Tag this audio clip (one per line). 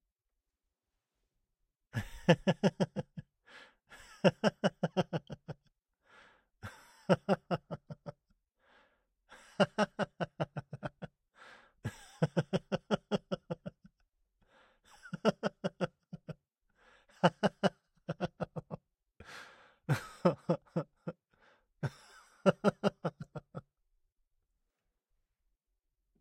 male
fun
funny
laugh
adult
laughing
smile
sweet
voice
man
hilarious
giggle
happy
cracking-up
laughter
laughs
human
giggling
kind